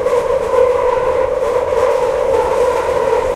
London underground 05 noise in train
Inside a noisy train making its way through the tunnels of the London Underground.
field-recording, london-underground, train, tube, underground